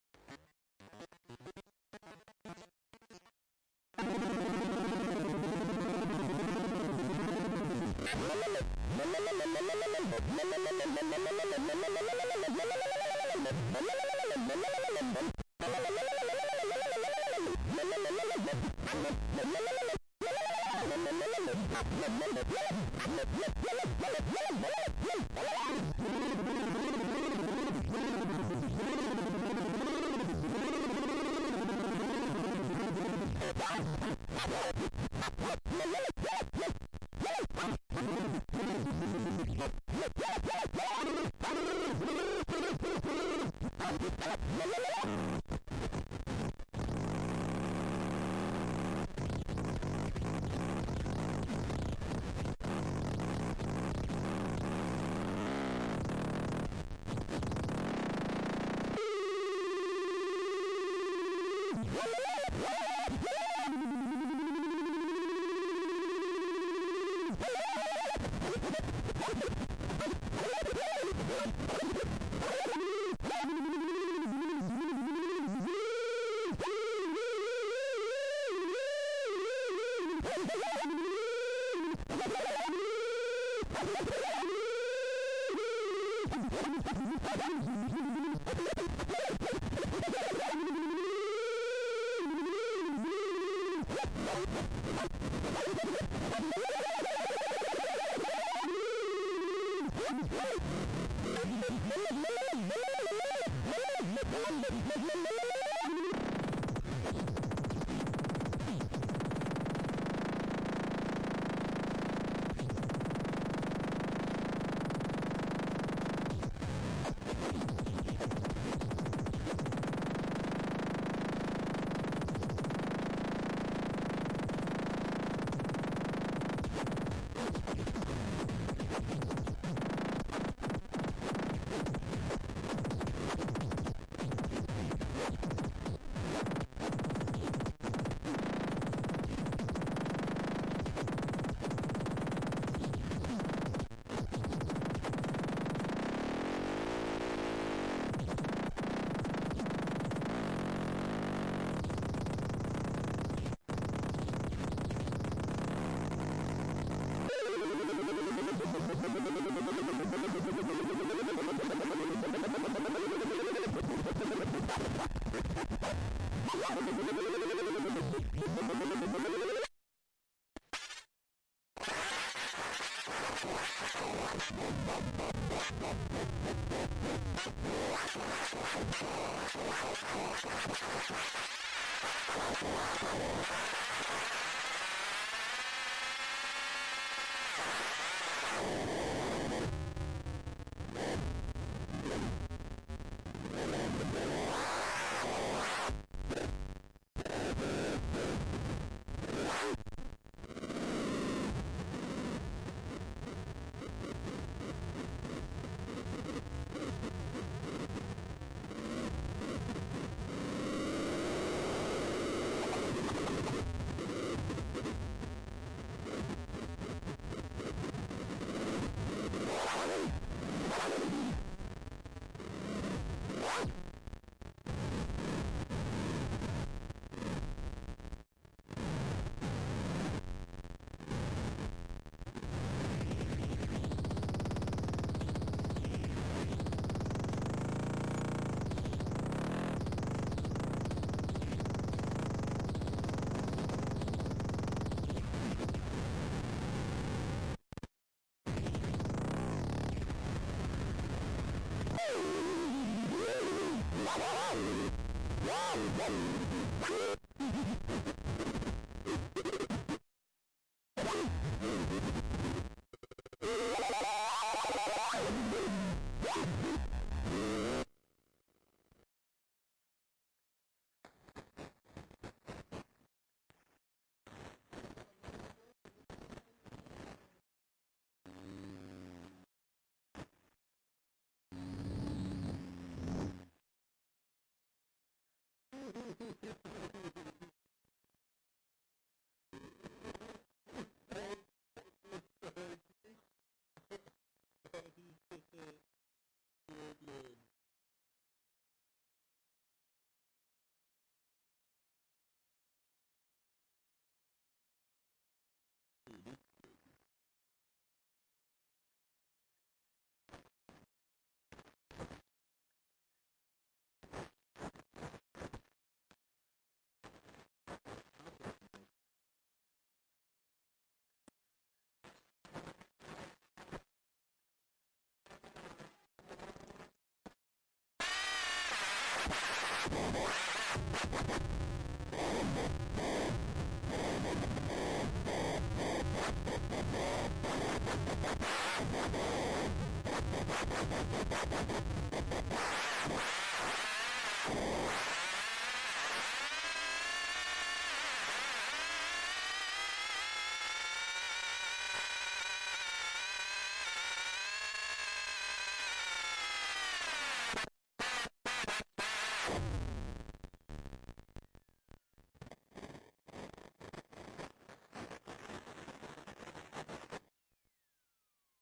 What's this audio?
Voice Crusher demo
The Voice Crusher is the packaging concept for Moldover's upcoming album called Four Track. it is a circuit board instrument in the shape of an audio cassette that makes hilarious weird electronic sounds. This recording was one continuous jam session, no cuts.
electronic-music, four-track, moldover, outer-space